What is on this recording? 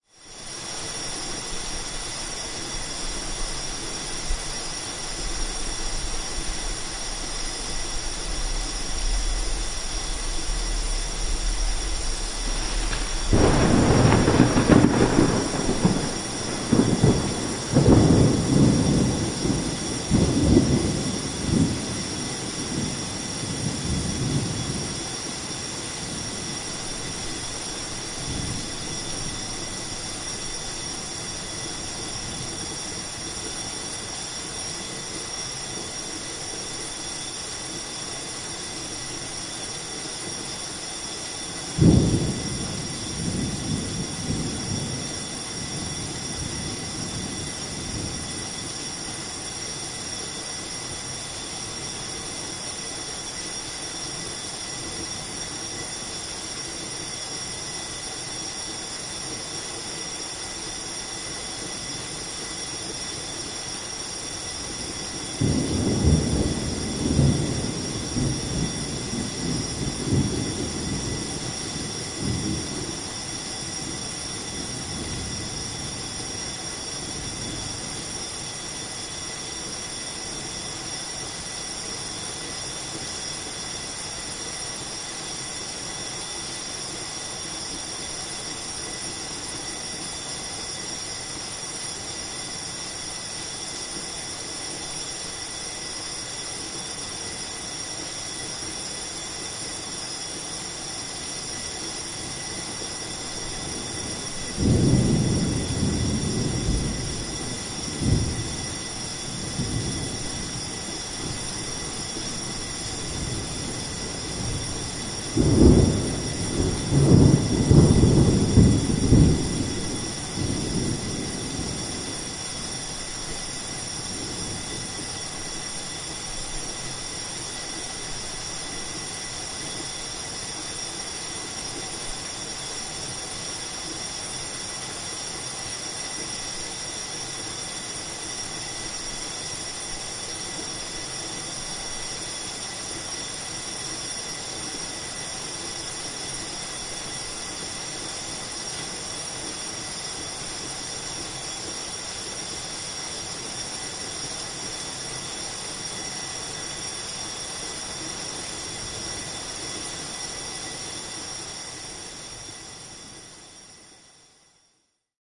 Korea Seoul Rain Thunder Firealarm 2
ringing, seoul, city, firealarm, south-korea, thunder, thunderstorm, raining, bell, rain, alarm, alert, rural, korea, southkorea, korean, field-recording